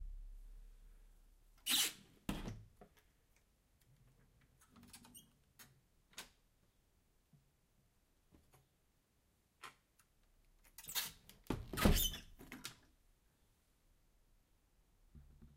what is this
Old squeaky door to my basement boiler room. (Zoom H2n)